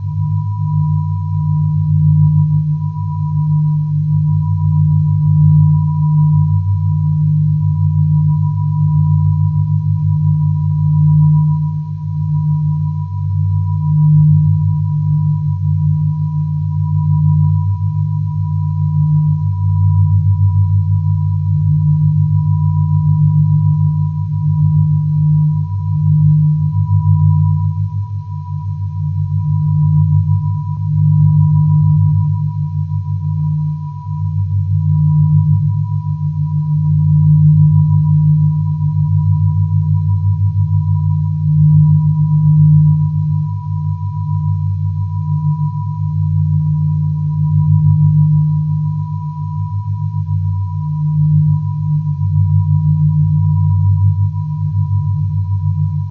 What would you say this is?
Subtle Horror Ambience for creepy movies and indie horror games. This seamless loop was randomly generated with a waveform algorithm which I have developed internally. The algorithm creates random soundloops from scratch and outputs the data to a RAW file which I import, edit and process further on in my sound editing software. In addition I have filtered the sound through a light flanger which slowly pulsates and adds more randomness. No sound source has been used whatsoever to generate the artificial sound itself!
Check also the pitched-up and shorter version of this ambient loop, included in my Alien Algorithm Pack, which sounds creepier and more alien.
alien, ambience, ambient, atmosphere, creepy, dark, eerie, haunted, horror, loop, random, scary, seamless, spooky, suspense